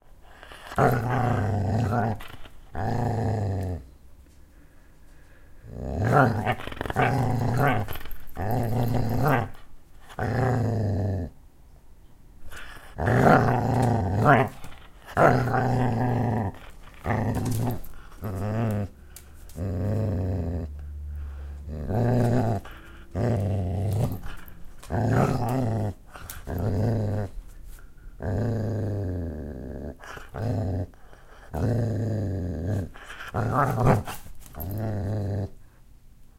Dog growl
Tug of war with my dog sounding like a ferocious beast. Recorded with a Zoom H5
dog, growl